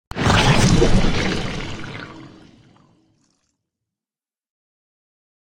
small-spill-splash-pour-splat-paint
Splashy, maybe kind of gross sounding.